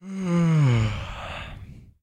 Sonido de Bostezo
Bostezo, Sleep, Noise